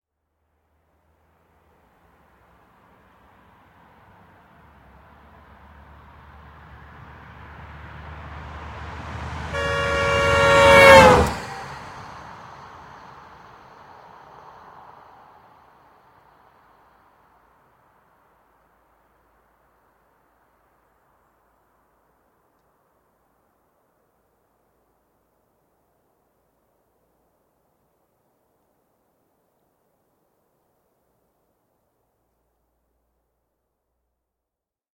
audi a4 b8 20tdi exterior engine passby horn doppler mono
This ambient sound effect was recorded with high quality sound equipment and comes from a sound library called Audi A4 B8 2.0 TDI which is pack of 171 high quality audio files with a total length of 158 minutes. In this library you'll find various engine sounds recorded onboard and from exterior perspectives, along with foley and other sound effects.
audi, speed, effect, horn, motor, sound, high, car, diesel, acceleration, passby, doppler, automobile, driving, start, exterior, a4, vehicle, drive, engine